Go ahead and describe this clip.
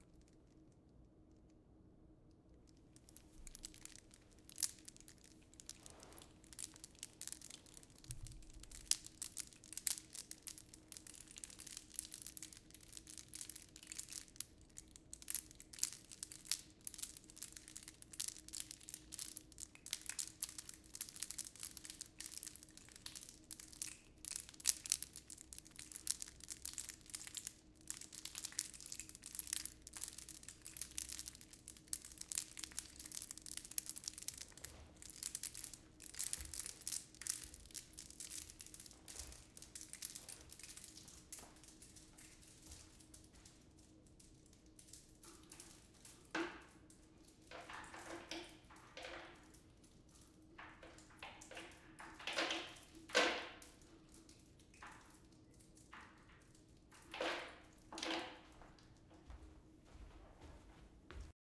Cables Clinking
cables, clinking, Guitar, input, together